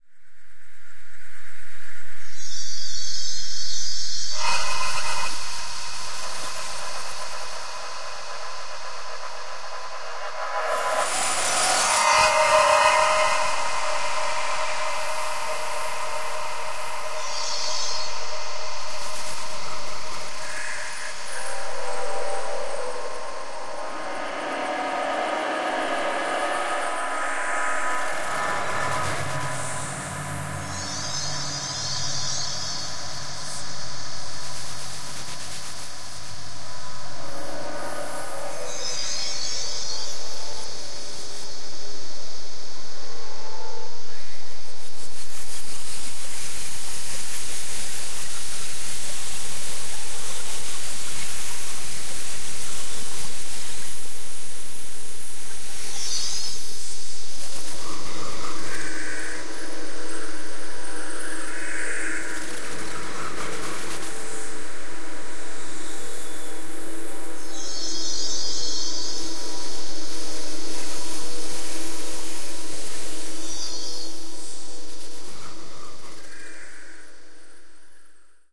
abused sphere
Soundscape created with Yuroun's Sphere enemble, in Reaktor, using his Abused piano sample map.
Ambient, Drone, Horror, Synth